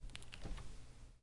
Fridge Door opening at near distance.